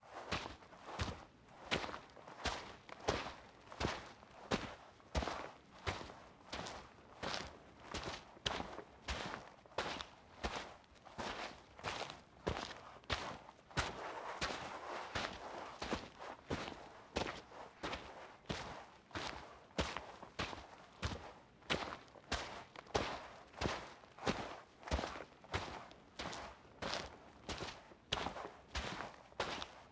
Footsteps on Mud with Raincoat
Foley recording for picture of a person walking through muddy terrain with raincoat put on.